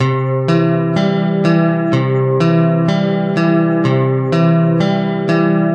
guitar arpeggio C
guitar arpeggio recording with ableton live
major, guitar, guitar-chords, arpeggio, C